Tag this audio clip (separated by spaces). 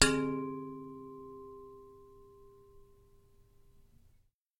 metallic bell field-recording sword resonant metal ping